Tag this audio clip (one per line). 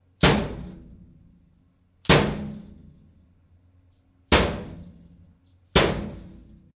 impact
owi
metal
metallic
hit
stick
clang
strike